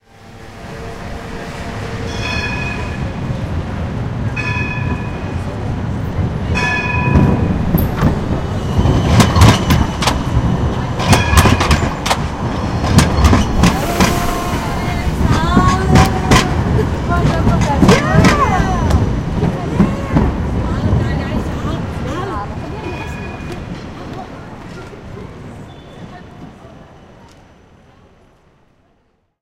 Tram02 links rechts
A 30" clip of a streetcar (tram) in Amsterdam (the Netherlands) passing by using its bell. Later in the clip voices of people passing by can be heard.
amsterdam, bell, field-recording, streetcar, tram, tramway, voices